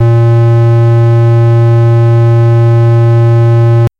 LR35902 Square As3
A sound which reminded me a lot of the GameBoy. I've named it after the GB's CPU - the Sharp LR35902 - which also handled the GB's audio. This is the note A sharp of octave 3. (Created with AudioSauna.)
fuzzy, square, synth, chiptune